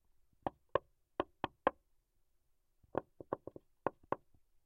Knocking on Plaster Wall
knocking, knuckles, plaster, wall